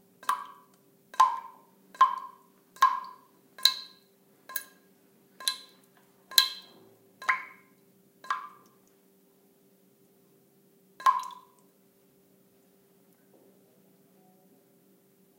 20110924 dripping.mono.10
dripping sound. Sennheiser MKH60, Shure FP24 preamp, PCM M10 recorder